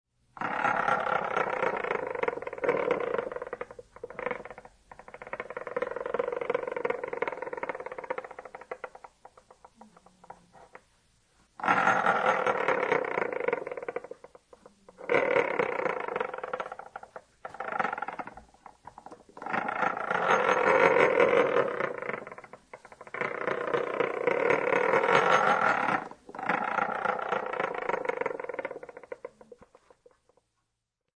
This is a 1/4 inch drive ratchet extension that I rolled on the counter top. Recorded with my Yamaha Pocketrak with one track duplication. Thanks. :^)